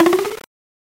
hitting a Pringles Can + FX